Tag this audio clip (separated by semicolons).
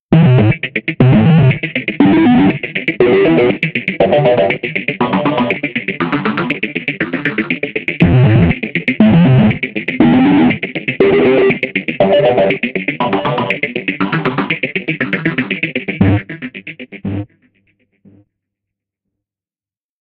120bpm; arpeggio; bass; harmonic; melodic; sequence; synth